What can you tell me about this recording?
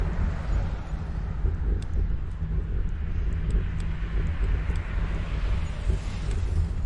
SUBIDA A PATIOS sonidos del timón 2
Proyecto SIAS-UAN, trabjo relacionado a la bicicleta como objeto sonoro en contexto de paisaje. Subida y bajada a Patios Bogotá-La Calera. Registros realizados por: Jorge Mario Díaz Matajira, Juan Fernando Parra y Julio Ernesto Avellaneda el 9 de diciembre de 2019, con grabadores zoom H6
Proyecto-SIAS, paisaje-sonoro, bicycle-sounds